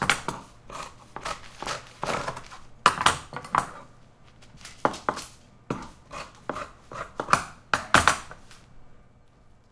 Seamstress' Large Scissors
Recorded at Suzana's lovely studio, her machines and miscellaneous sounds from her workspace.
scissors, Seamstress, sewing, serger, tone, button, clothing, machine, fashion, design, hanger, room, fan, Large